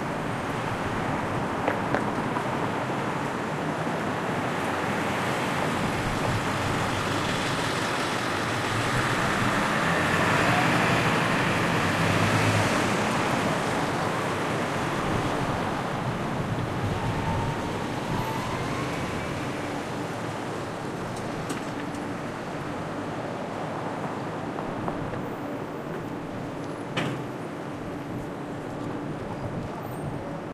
Mid range field recording of the area in front of the New York Times building in New York, taken next to the building on the corner of 8th Ave and West 40th St. Cars can be heard driving by, horns are honked, brakes are trodden etc. A sweeper vehicle features sometimes.
People can rarely be heard, as the recording was done at about 6 AM on a Saturday morning in March 2012.
Recorded with a Zoom H2, mics set to 90° dispersion.